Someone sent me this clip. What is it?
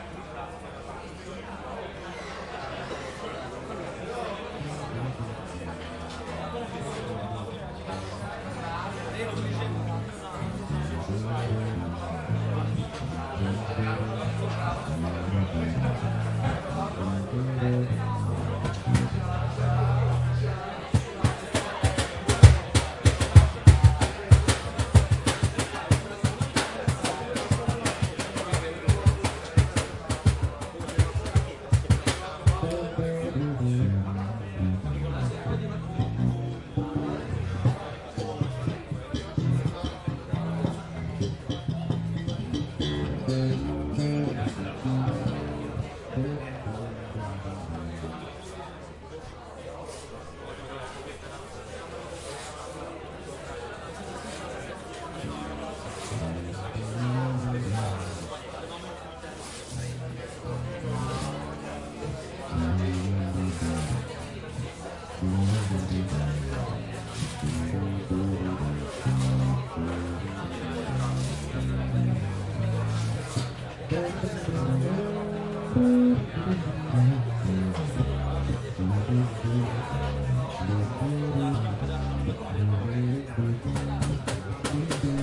Prague venue + live band rehearsing
Recording of a Prague music club atmosphere with live band rehearsing on stage, people talking. Ambience. Recorded by Zoom H4n and normalized.
ambience, band-band, check, club-live, crowd, field-recording, people, people-ambience, rehearsal-sound, talking, venue-Prague-music, voices